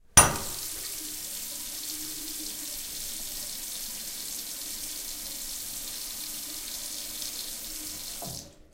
Grabación del agua de un grifo en un lavabo del campus de Upf-Poblenou. Grabado con zoom H2 y editado con Audacity.
Recording of the sound of a tap in a bathroom in Upf-Poblenou Campus. Recorded with Zoom H2 and edited with Audacity.
agua, campus-upf, UPF-CS13, wc, grifo